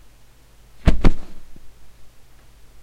Some fight sounds I made...